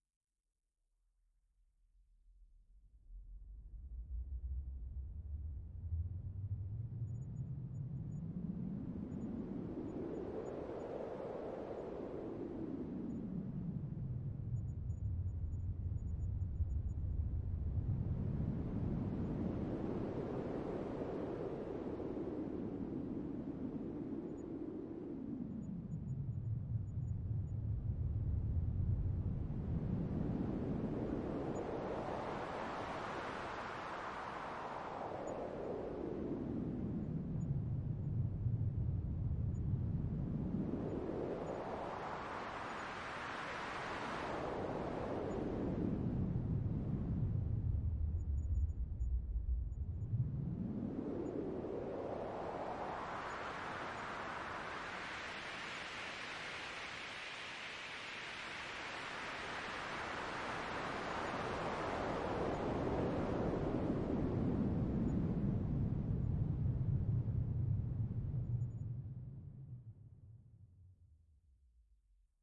Wind like noise produced with supercollider